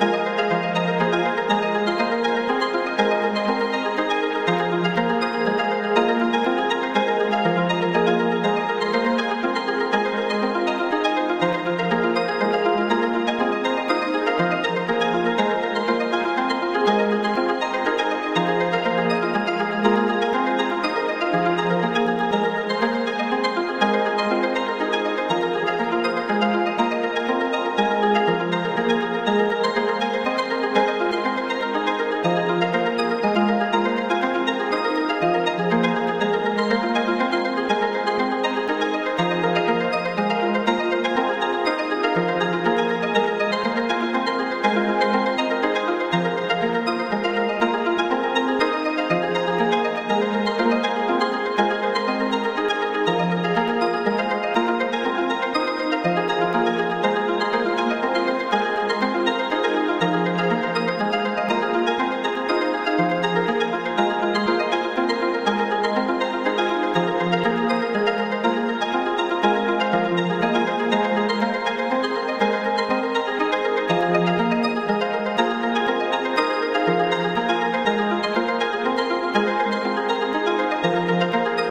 very emotional and dreamy lushful pluck sound creted using a 4play M4L device
made in albeton live.
string, pluck, film, movie